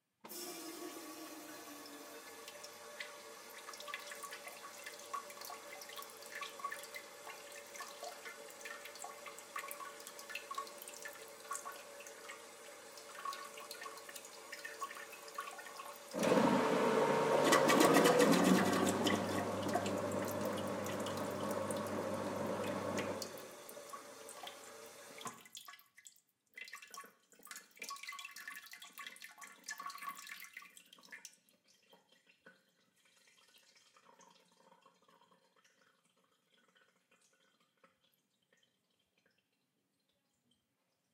WC-Chasse d'eau
A drive out of water from the toilet recorded on DAT (Tascam DAP-1) with a Sennheiser ME66 by G de Courtivron.